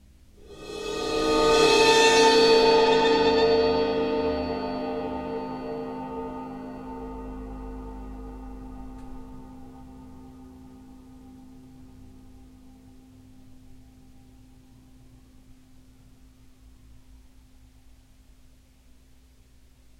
Cymbal Swell 009
bowed cymbal swells
rare 18" Zildjian EAK crash ride
clips are cut from track with no fade-in/out. July 21St 2015 high noon in NYC during very hot-feeling 88º with high low-level ozone and abusive humidity of 74%.
ambient, bowed-cymbal